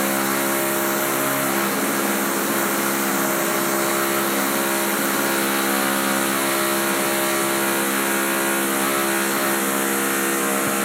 Our school is building a swimming and sports complex. Here are our recordings from the building site.
BuildingSite, Switzerland, Sports-Hall, TCR